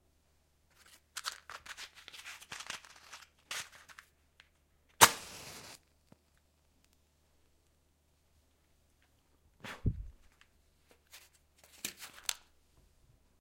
Lights a candle light with a match
I light the candle light with a match in front of two microphones.
microphones 2 OM1(Line audio)